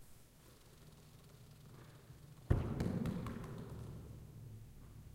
Basketball Roll, Hit Wall
hits, sports, basket-ball, ball, basket, field-recording